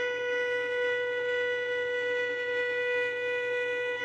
poppy B 3 pp sul-tasto
recordings of a violin (performed by Poppy Crum) playing long sustained notes in various expressions; pitch, dynamics and express (normal, harmonic, sul tasto, sul pont) are in file name. Recordings made with a pair of Neumann mics
high, long, note, pitched, shrill, squeak, sustain, violin